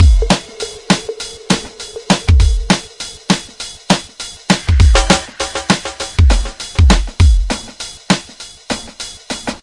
Trip Hop Dub City Beat
big beat, dance, funk, breaks